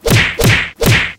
Punching and Uppercut
This a sound that I mix three of the same sound to create this master piece,
Impact, Synthesizer, Hits, Hand-To-Hand-Combat, Punching, Whack, Uppercut, Fighting, Fight, Boxing, Hit, Pow, Hitting, Fist-Fight, Combat, Mix, Fist, Ekokubza123